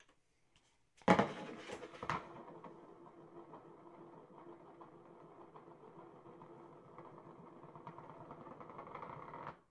spinnig bowl4
spinning bowl on table
table, bowl, spinning